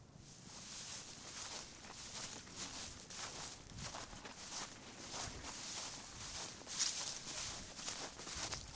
Walking on dry medium-length grass in tennis shoes
Sound Devices 722
Shure SM-57
feet, walking, dry, foley, field-recording, grass, walk